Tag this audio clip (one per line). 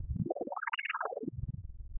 synth
space
image